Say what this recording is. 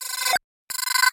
plain vintage digital counters in the 80s style.
scoring, computer, vintage